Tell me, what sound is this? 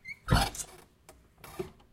SFX for the game "In search of the fallen star". Plays when the player opens a gate or a chest.